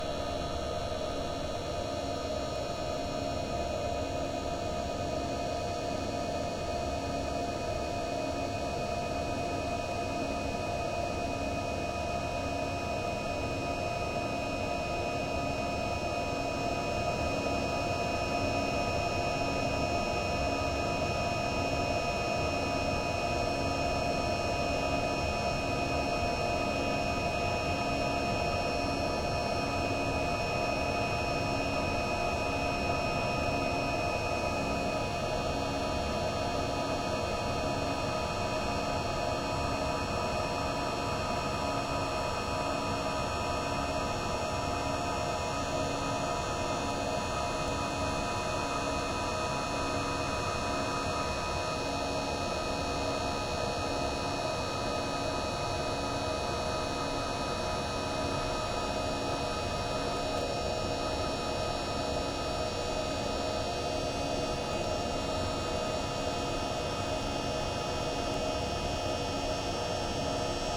airplane-interior-rullaggio2-taxiing2
this bank contains some cabin recordings by a contact mic placed in different locations.
recorded by a DY piezo mic+ Zoom H2m